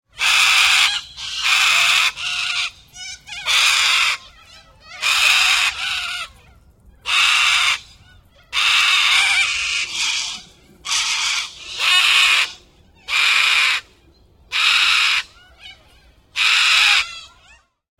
Corellas screech multiple
Multiple Australian Corella birds screeching together
Australian, Australia, screech, Corella, bird, field-recording, birdcall